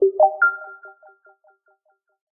App Ui Sound
GUI, interface, mobile